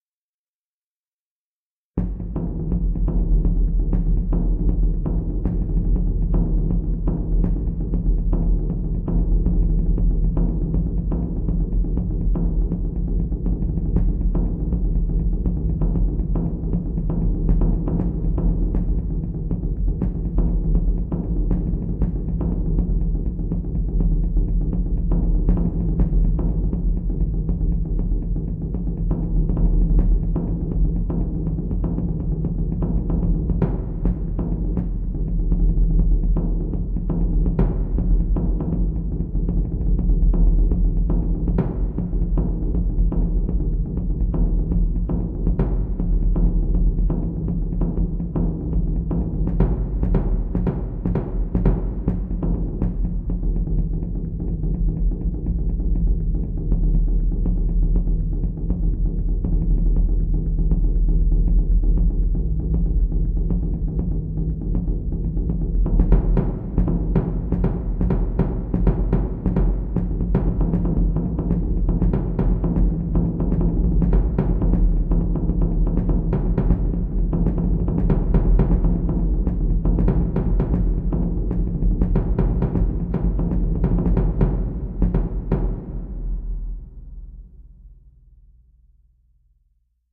Taiko Drums
A classic Taiko Drum Rhythm.
Clip, Drums, Sound, Taiko